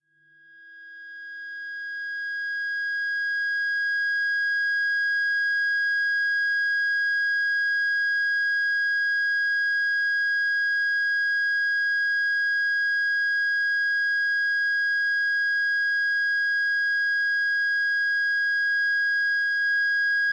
Dist Feedback E-1st str
Feedback from the open E (1st) string.
miscellaneous, guitar, distortion, extras, distorted, distorted-guitar